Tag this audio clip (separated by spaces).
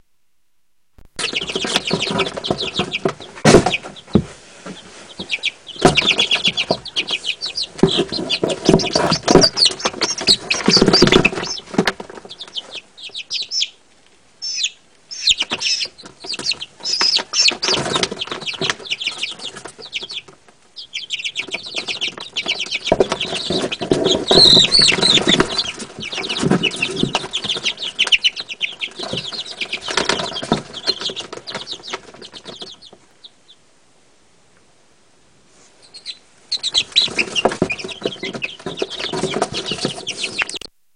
bird cheep chick animal box